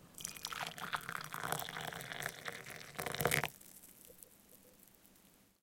Pouring tea into a tea cup. Recorded with a Zoom H5 and a XYH-5 stereo mic.
Beverage, Cup, Drinking, Earl, English, Grey, Hot, London, Pour, Pouring, Tea, Water